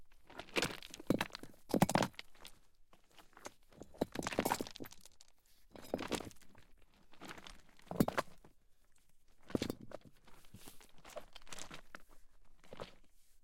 SFX Stone Calcit DeadSea Movement #3-186
glassy stones slightly moving